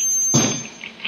This glitch is a feedback followed by a hard noise and then a rare click. Probably it's a guitar and noise stompbox played by Rafael Pinillos
Captured with the internal microphone of my MacBook Pro during a warm up of BBVN
Big Band of Visual Noise at El Generador. Santa Cruz de Tenerife
noise, feedback, glitch